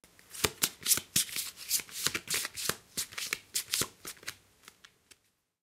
Shuffling cards 02
Sound of shuffling cards